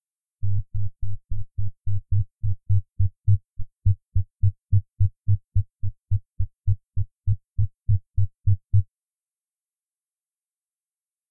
HITTING MICROPHONE 01
sonido de golpe sobre la cabeza del micrófono